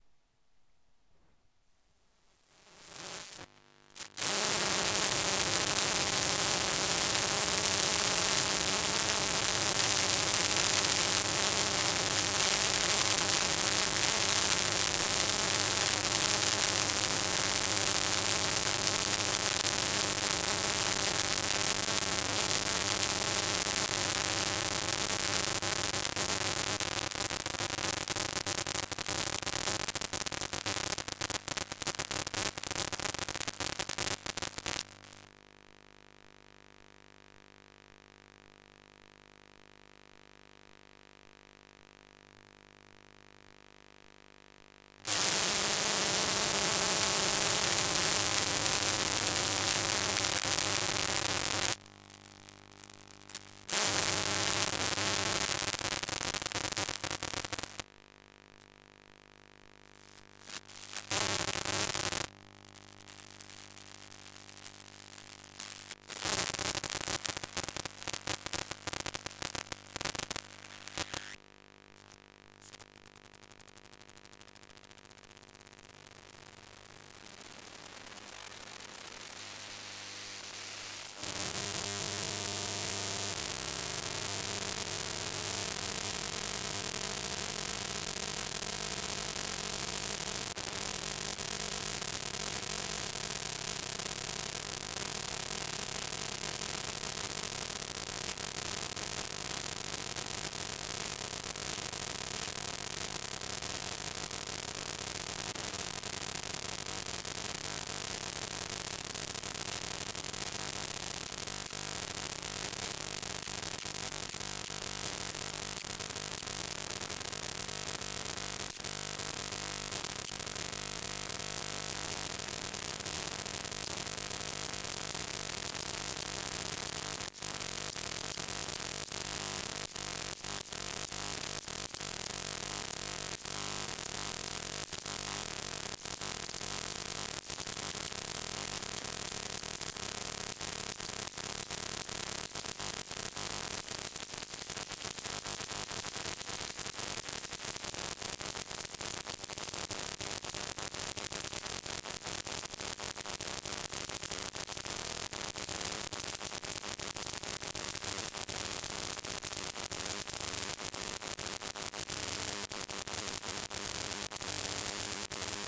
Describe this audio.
Broken Energy Saving Lightbulb 01
An energy-saving lightbulb in my living room started blinking. (You know the ones with the swirly spiral?)
Before replacing it I recorded the electronic noise it makes using a Maplin TP-101 Telephone Pick-Up connected to my Zoom H1.
This is the first recording. The light was always on and I simply brought attached the pickup coil to the lampshade. The lightbulb would go through periods of staying on normally (no blinking) where it was mostly silent(or slight buzz). And then would go into this very irritating random flickering, when the pick-up coil would get all this electronic noise.
If you think the noise is irritating, the light flickering is actually much worse! You could not stay in the room for more than a couple of minutes without going mentally insane.
Note that when flickering the lightbulb produces no audible noise. The noise is only electronic and cannot be recorded with a normal microphone. A telephone pickup or some other type of coil must be used to record it.
telephone-pickup, bulb, blinking, buzzing, coil, short-circuit, electric, static, lightbulb, spark, buzz, noise, energy-saving, malfunction, irritating, light